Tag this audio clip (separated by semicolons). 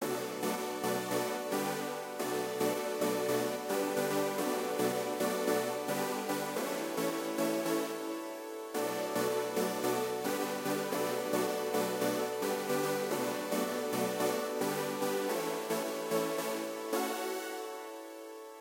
electronica; free; pads